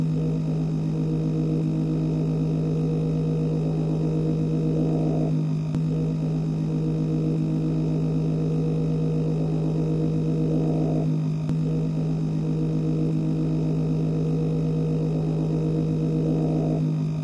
A machine sound created using a hairdryer, originally used as a moon buggy sound effect.